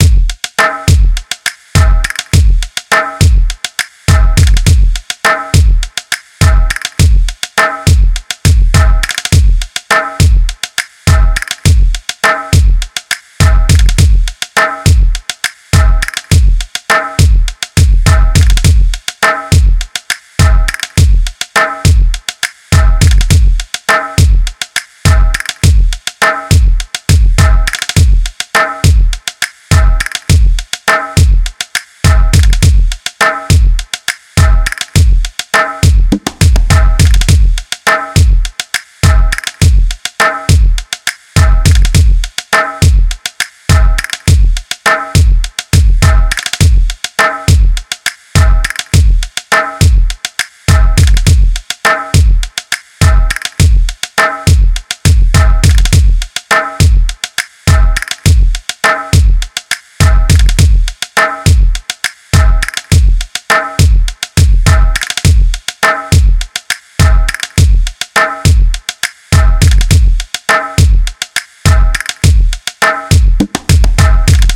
Jump da joint drumloop
A hiphop loop with a lot of swing in it.
drums, groove, hiphop, oriental, rnb